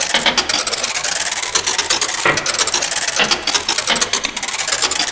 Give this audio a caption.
car bot
robot sound,
sound recorded and processed with vst,tone robot
robotic, computer, alien, bionic, android, robot, robotics, mechanical, automation, interface, game, machine, droid, space, cyborg, intelligent